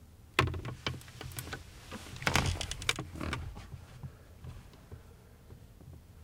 chair sitting 3
By request.
Foley sounds of person sitting in a wooden and canvas folding chair. 3 of 8. You may catch some clothing noises if you boost the levels.
AKG condenser microphone M-Audio Delta AP
chair, creek, foley, wood